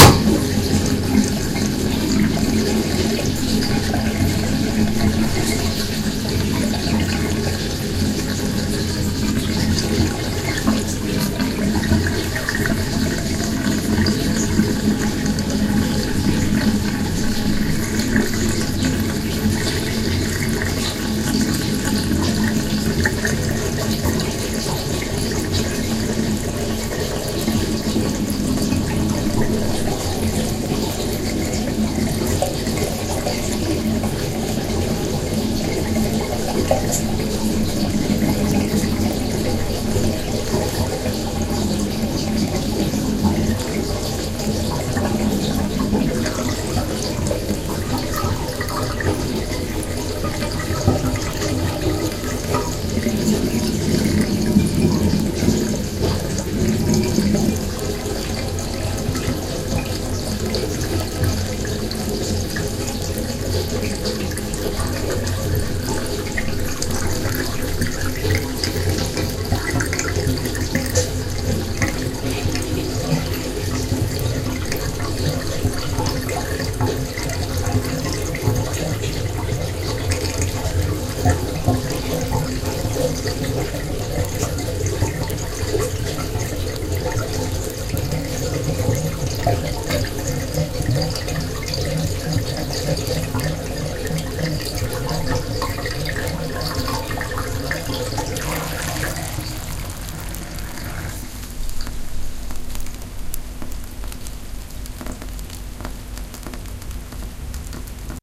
recording of water running down the bathtub drain after the bath
organic rhythms are emerging out of one another as the water is whirling down and getting less in volume as the whirl changes in speed and volume and resonance with the metal tub and the drain tube
recorded with a stereo microphone on minidisc the microphone left dangling and turning over the water to create random panning
bath, bathroom, bathtub, drain, gargle, maelstrom, tube, water, whirl